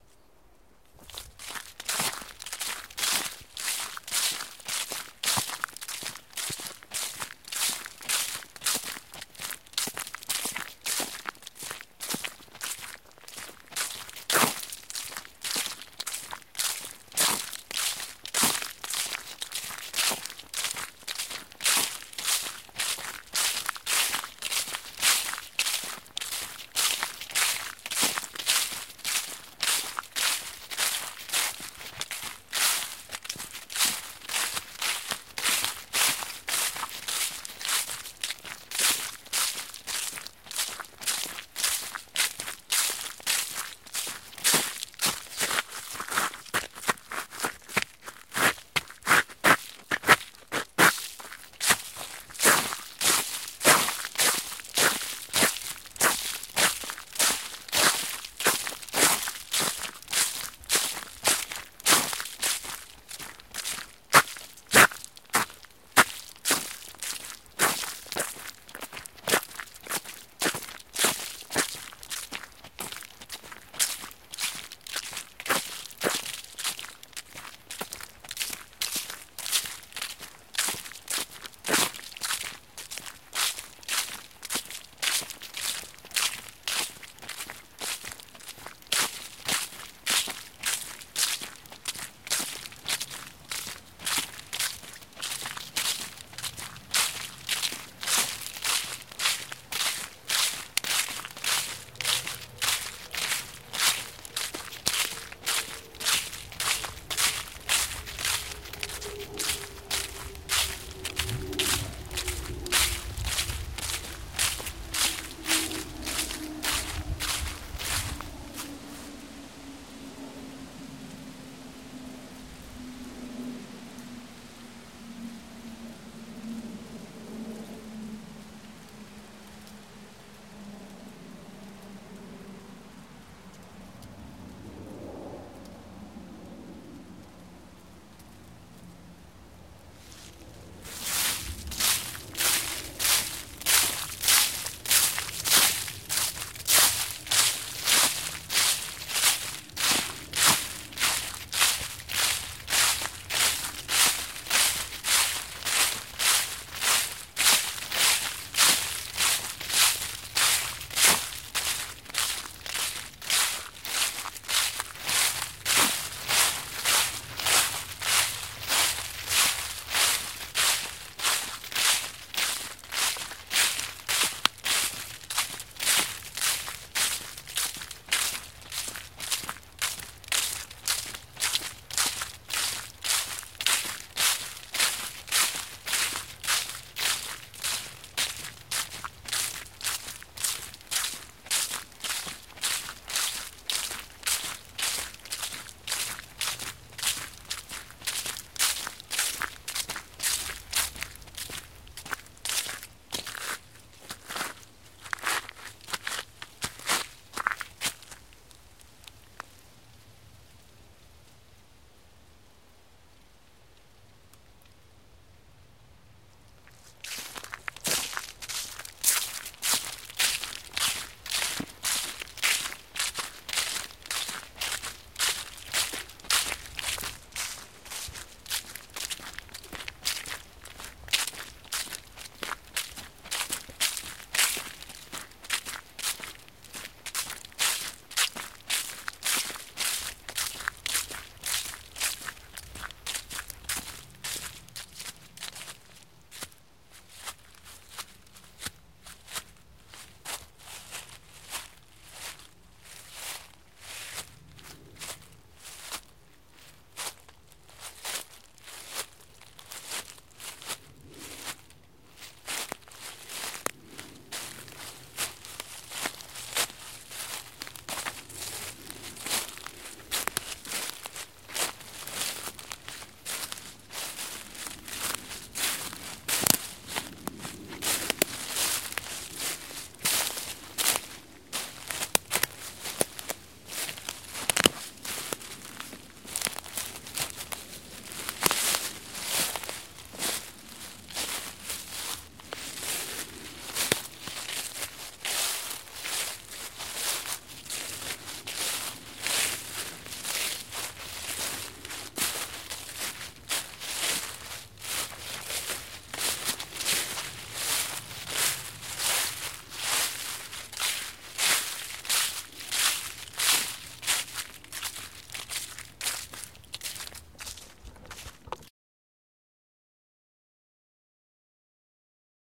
walking ambience forest autumn crunchy step walking leafes-001
walking ambience forest autumn crunchy step walking leafes leaves walk footstep crunch
ambience, autumn, crunch, crunchy, footstep, forest, leafes, leaves, step, walk, walking